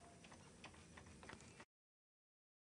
silla moviendose y haciendo ruidos
silla, chirridos